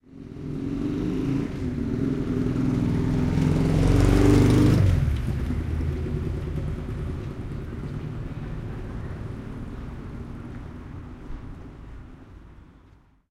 A car passes on the street in Paris.
Recorded on 7 June 2011 with a Zoom H4 using a head-worn binaural microphone pair. No processing.